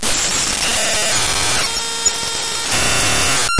Raw import of a non-audio binary file made with Audacity in Ubuntu Studio
binary, computer, data, digital, distortion, electronic, file, glitches, glitchy, random, raw